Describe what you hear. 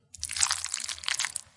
stroganoff-short 9
Sound of some stroganoff being stirred around.
mix, slimy